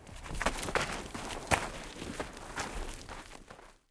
Gravel climbing
Footsteps on a dirtheap with a run-up and sliding back down